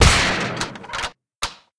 Original Gun sound Design using metal gates, wooden blocks, and locks.